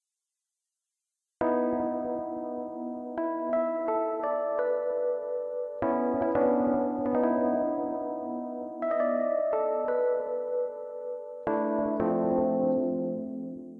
A short 170BPM rhodes jam with plenty of space either side for cutting and looping.
Made using the MrRay VSTi with added delay and reverb effects and sequenced in Madtracker II